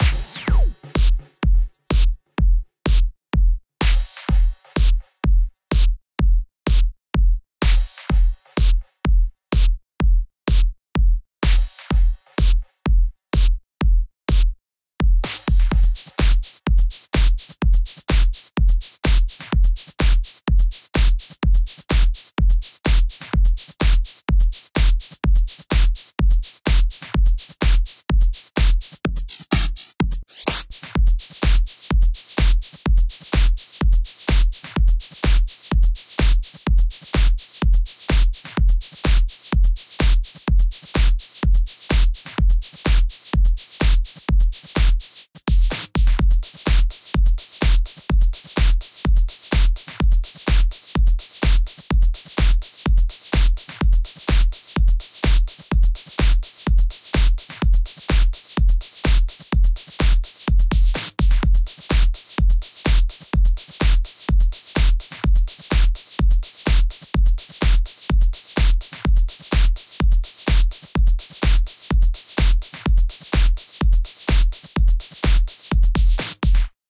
clubgroove complete 1
club, dance, Glubgroove, house, samples, techno, trance